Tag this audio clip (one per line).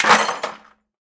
break
broken
bucket
crumble
glass
pottery
shatter
smash
smashed